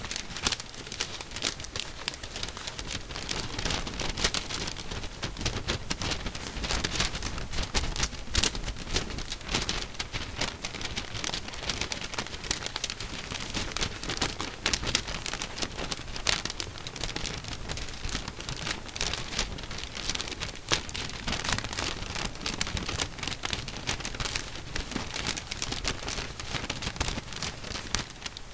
Paper sound
Just sound of paper....what else to say? :D
folding, sqeezing, sound, paper, mocking